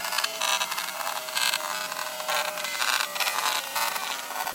Made from a powerdrill